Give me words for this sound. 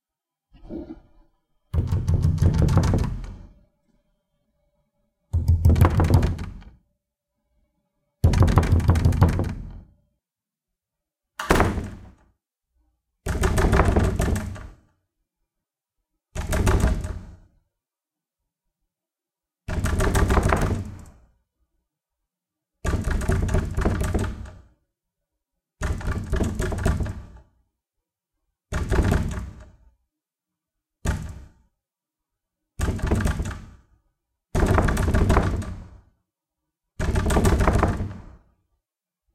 pound door
urgent door pounding, with the knocker on both sides of the door with the mic in a stationary location.
close, door, knock, pounding, urgent, wood, wooden